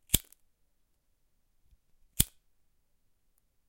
Bic lighter, recorder with zoom h4n pro. No postprocessing.